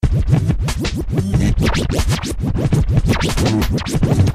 92bpm QLD-SKQQL Scratchin Like The Koala - 016
record-scratch, turntablism